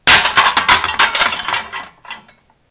Fire Poker crash.1
Close-proximity recording of a Fire poker being forcefully put back into the set of fire utensils. Rattling of the set is stopped abruptly.